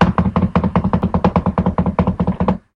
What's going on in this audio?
Rapid knocking on a table. Meh.